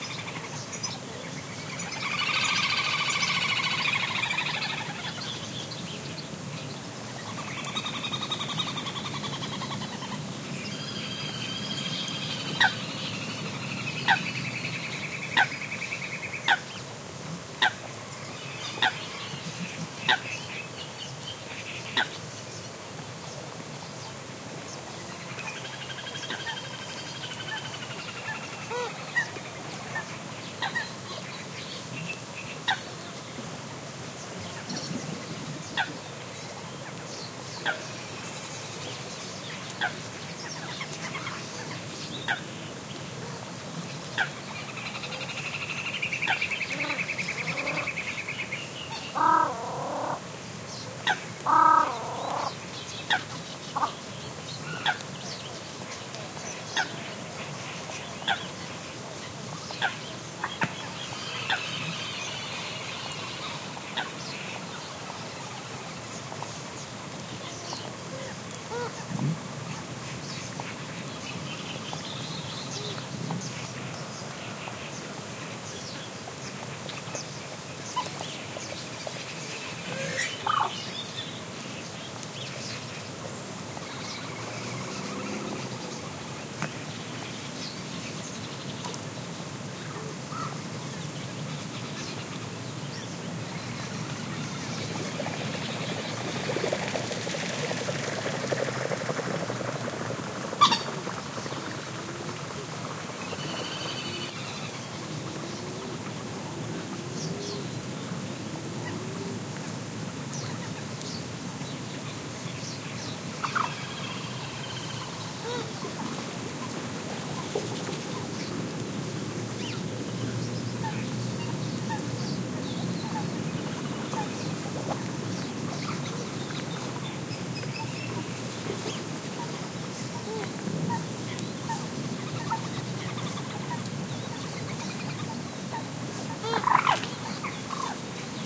20180401.marsh-015.coot
Marsh ambiance, dominated by callings from Common Coot. Clippy XLR EM172 Matched Stereo Pair (FEL Communications Ltd) into Sound Devices Mixpre-3. Recorded near Centro de Visitantes Jose Antonio Valverde (Doñana National Park, Spain)